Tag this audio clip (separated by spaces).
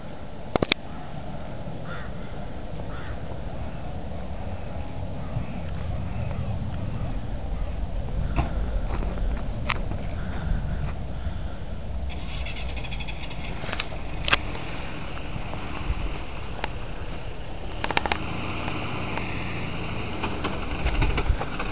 breathe breath walk